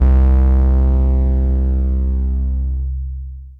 home made 808 bass drum , made using FL Studio and the plugin 3osc and camel crusher distortion ( Fl studio is fun )
808, bass, drum